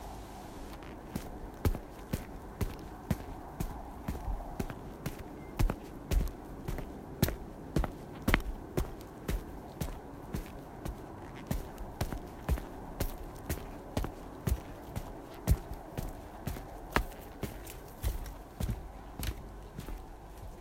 Concrete Footsteps 01
npc, steps, footsteps, sfx, player